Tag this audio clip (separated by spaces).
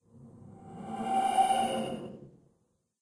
magic magical wand